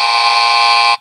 short buzzer
A simple, short sound of a buzzer, perfect for game shows or whatever you'd rather. Recorded with fourth generation Apple iPod.
buzz, alert, buzzer, game-show, alarm